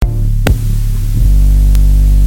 res out 02
In the pack increasing sequence number corresponds to increasing overall feedback gain.
automaton, chaos, computer-generated, feedback-system, neural-oscillator, synth